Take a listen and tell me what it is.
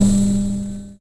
STAB 020 mastered 16 bit from pack 02
An electronic sound with a one second decay time, usefull as percussion
sound for a synthetic drum kit. Created with Metaphysical Function from
Native
Instruments. Further edited using Cubase SX and mastered using Wavelab.
electronic, percussion